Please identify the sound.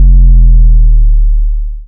some 808 i made in serum.
sorry but i dont know the key.